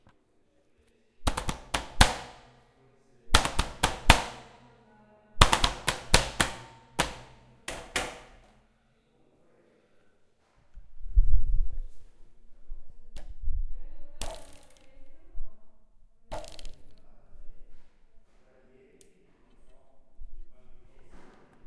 sella beat 001
mechanic, metallic